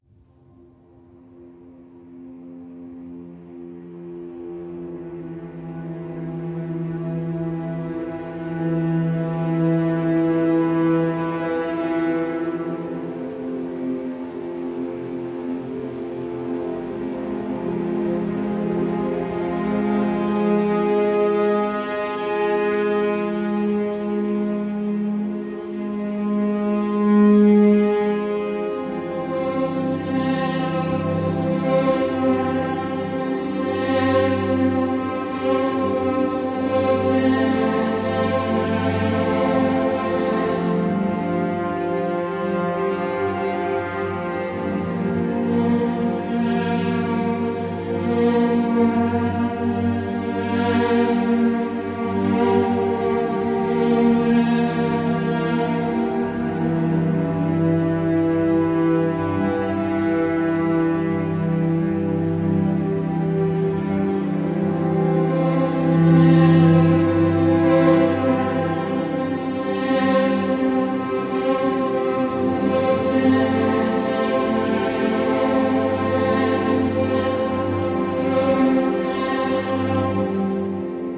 Slow Sad Mood Orchestral Strings Cinematic Atmo Music Surround
Ambient, Atmo, Atmosphere, Cinematic, Dark, Mood, Music, Orchestral, Sad, Slow, Strings, Surround